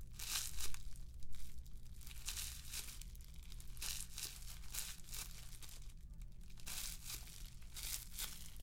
creapy spider steps made with a plastic bag